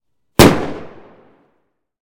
EXPLODE FIRECRACKER
Firecracker explosion. Recorded by a Sound Devices 302, Olympus LS100 and a Sennheiser MKH60